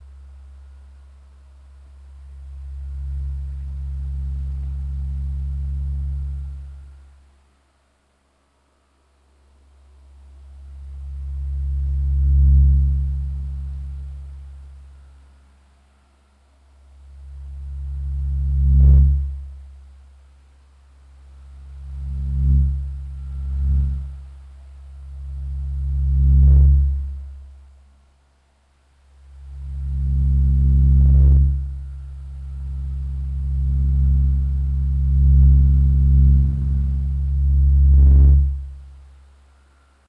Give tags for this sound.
Fan; tone; wind

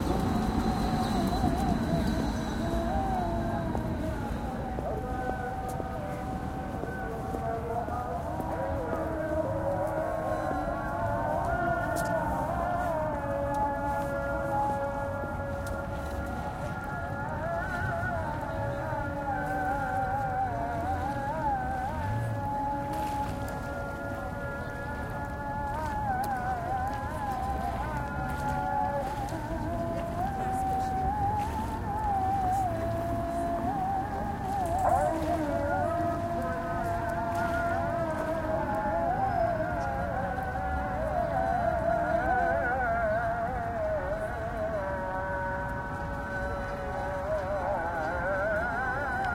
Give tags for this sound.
Field-recording; Namaz

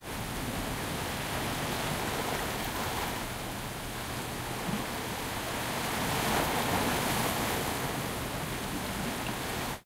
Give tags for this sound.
ocean; shore; beach; sea; water; seaside; waves; coast; wave

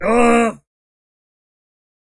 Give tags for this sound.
arg; death; grunt; hurt; pain; painfull; scream; screaming; yell

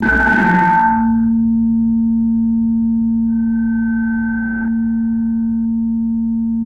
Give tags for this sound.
sfx; drone; noise; experimental; perc; distortion; dark; distorted

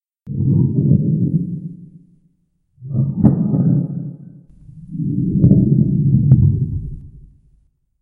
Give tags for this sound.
drone; ambient; rumble; ambiance; atmosphere; horror; deep

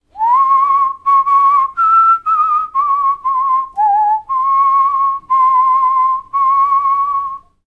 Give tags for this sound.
whistling; human; vibrato

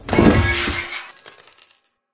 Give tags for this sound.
explosion; hitting; metallic

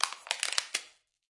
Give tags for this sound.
bin; bottle; c42; c617; can; chaos; coke; container; crash; crush; cup; destroy; destruction; dispose; drop; empty; garbage; half; hit; impact; josephson; metal; metallic; npng; pail; plastic; rubbish; smash; speed; thud

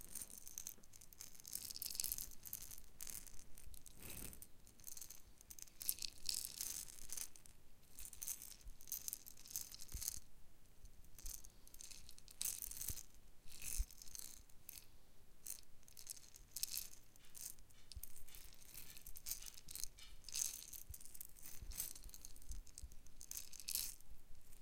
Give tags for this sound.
Foley; Movement; Clean; Close; Rustle; Grain; Texture; Sand

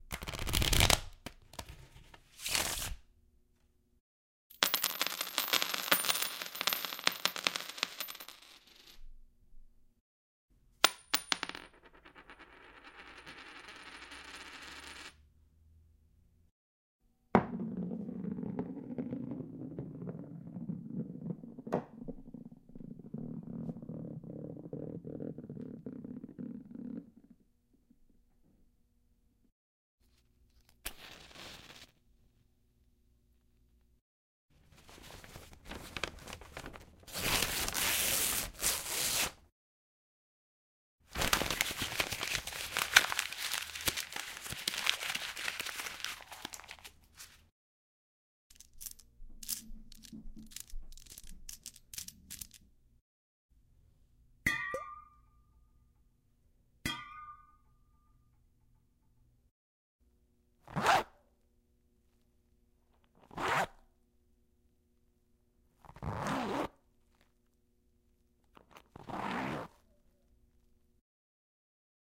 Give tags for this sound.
coin; croquet; matches

